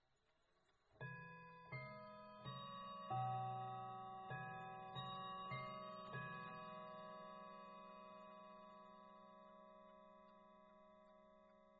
Chime 01 Half Hour
Mantle clock chimes; striking half hour. Recorded on Tascam DR-1 with Tascam TM-ST-1 microphone.
chimes, clock